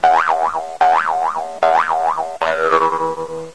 Slow idiomatic jawharp sound. Recorded at 22khz